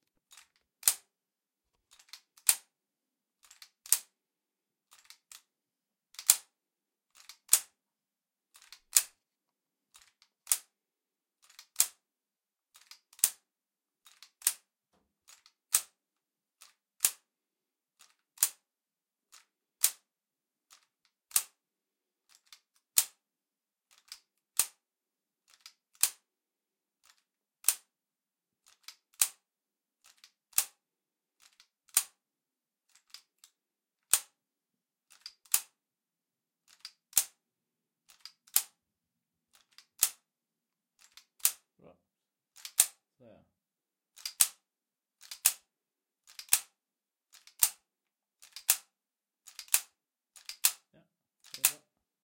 Recording of a 9mm pistols mechanics using a Sony PCM m-10.